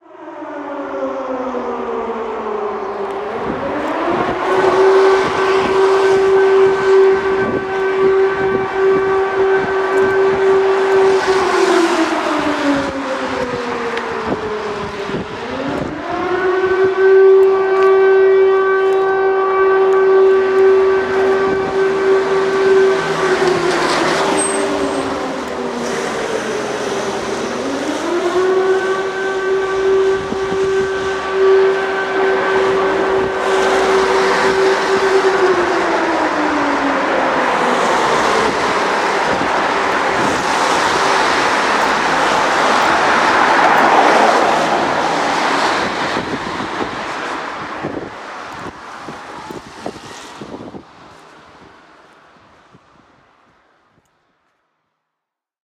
endoftheworld end alarm silent hill catastrophe pompier rue vent voiture cars windy fire steet wind
alarm, cars, catastrophe, end, endoftheworld, fire, hill, pompier, rue, silent, steet, vent, voiture, wind, windy